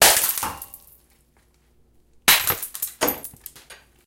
Glass bottles breaking on cement. Microphone used was a zoom H4n portable recorder in stereo.